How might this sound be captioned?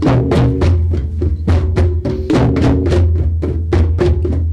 CongaGroup3 2turnsStraight
Group plays a rhythm in an introductory hand drum class.